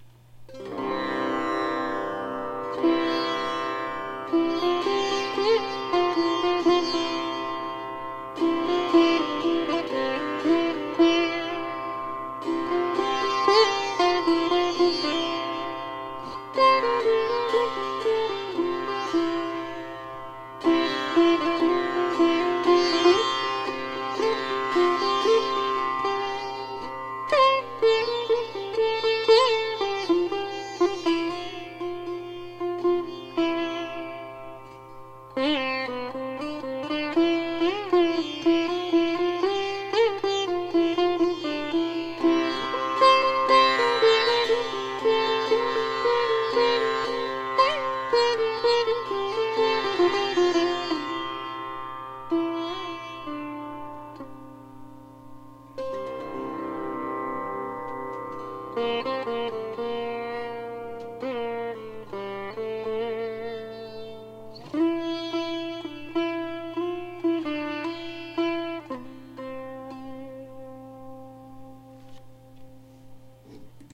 Sitar long
Recording of me messing around on the sitar in my basement.